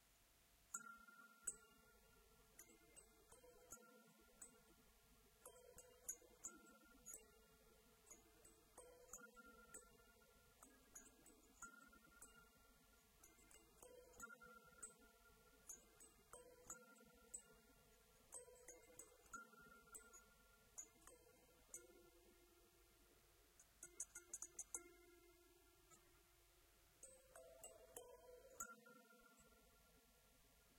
Recording of a Hokema Kalimba b9. Recorded with a transducer attached to the instrument and used as microphone input with zoom h2n. Raw file, no editing.
filler, instrumental, kalimba, loops, melodic, thumbpiano